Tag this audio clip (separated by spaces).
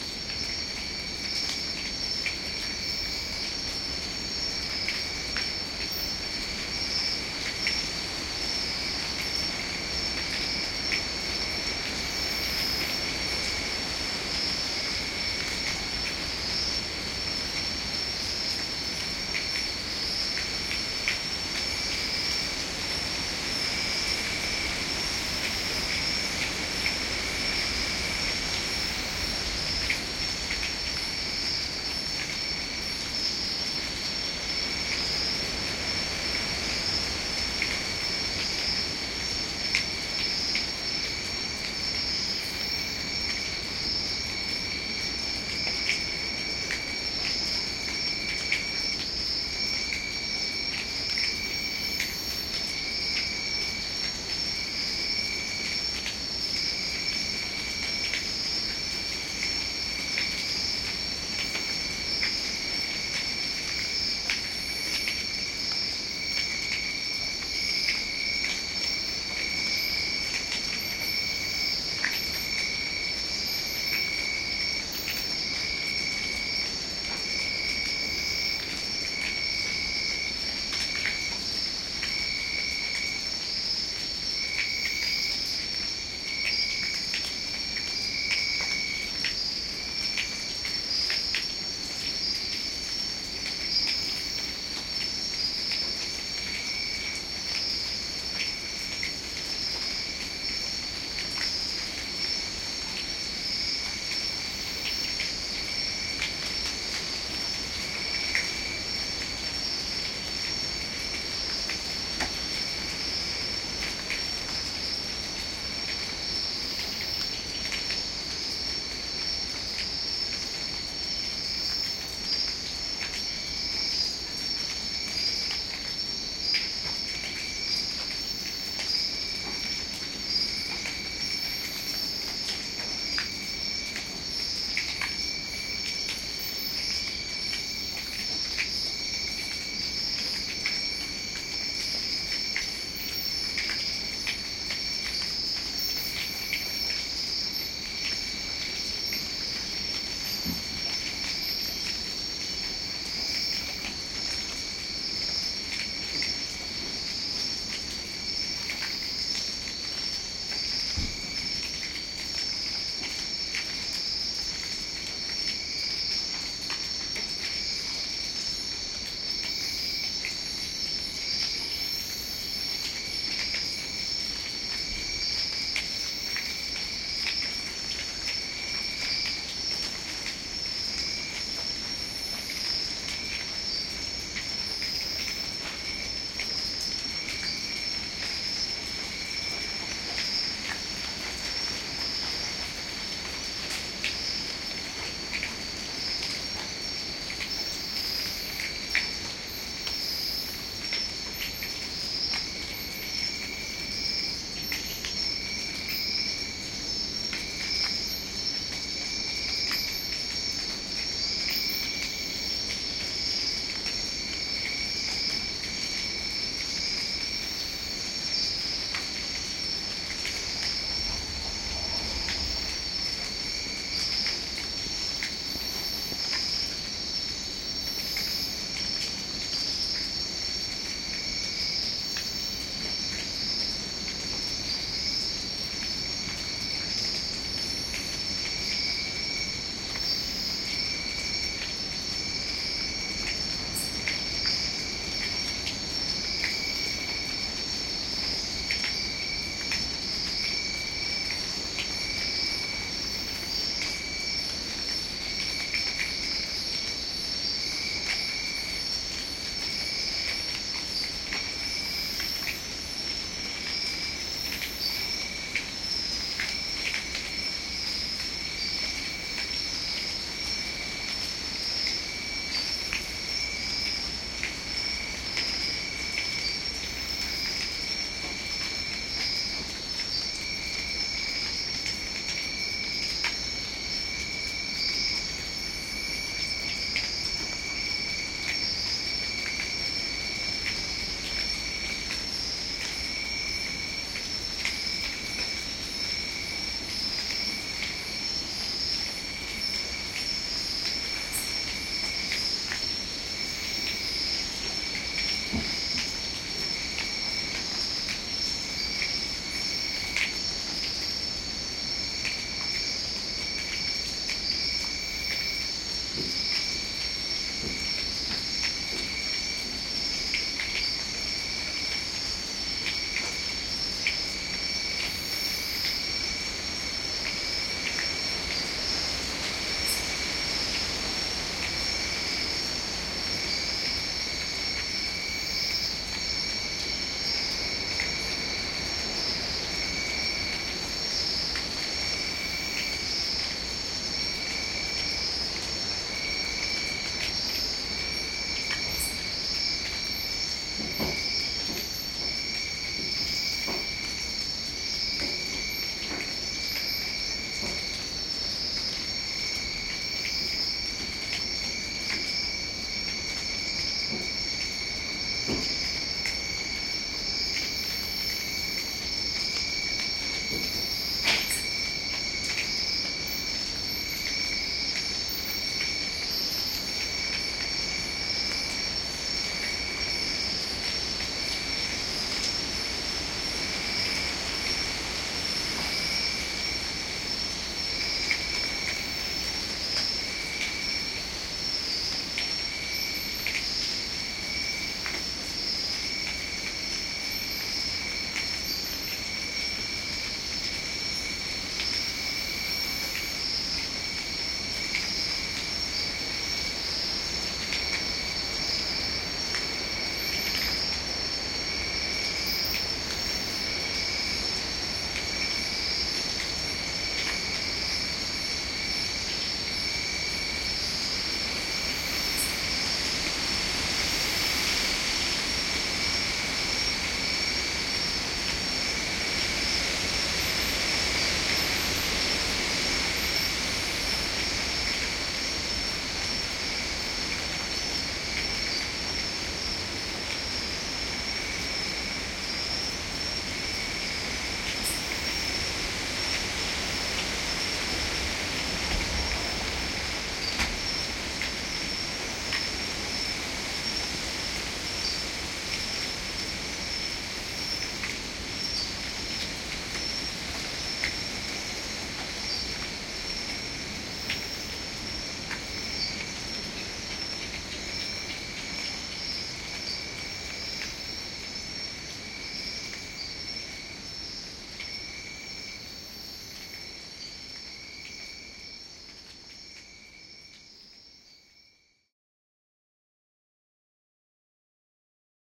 aftertherain Bijagua Costa crikets night Rica Tenorio